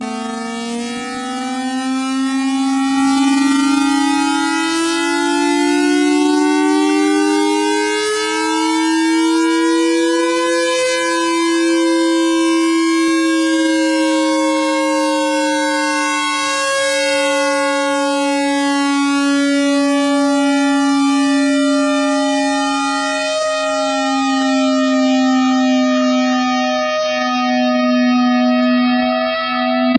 An interesting synthesized siren sound I made in Audacity.